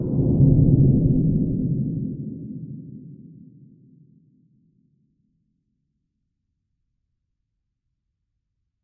dark movement2
dark, rumble, fx